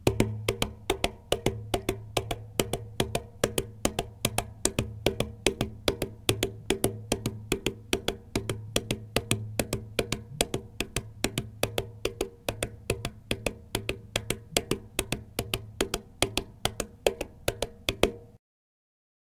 Pounding Tire fast
Hands hitting top of tire--like a horse running
pounding, bicycle, horse-running, fingers, whirr, wheel, spinning-wheel, spinning, hand, rhythm, bike